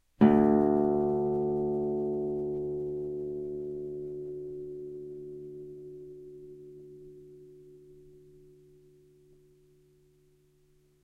D low open string
open low D string on a nylon strung guitar.
low, guitar, open, classical, nylon, spanish, string, d, note